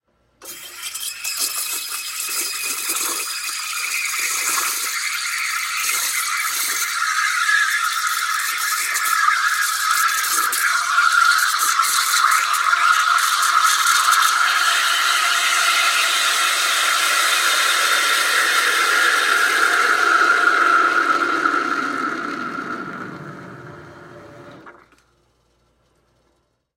Cappuccino coffee steamer throating. - 01-03
espresso machine steaming or frothing milk, (was ment for a malfunction machine in the movie)